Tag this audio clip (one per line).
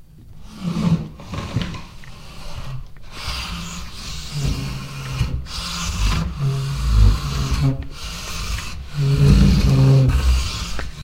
bassy
creepy
rolling
heavy
sliding
dragged
gliding
dragging